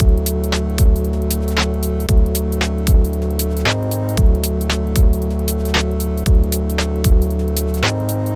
Short electronic loop made in fl studio using the massive plugin